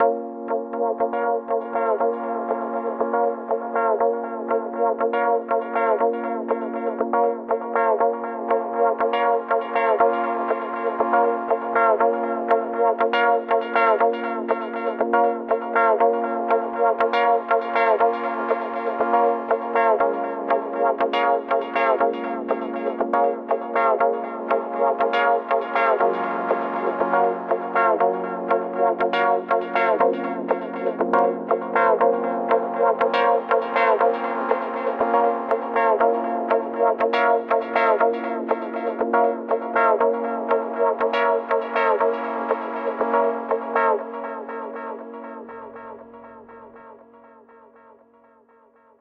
Sequenced antialiased Saws as Chords with Filter Modulation at the complete Sound. Created with Absynth 5 ... I imagined a sleeping Sequence in combination with the Punchbass Sound allready in Music Samples and some wide reverbed Drum Sounds. Created in Music Studio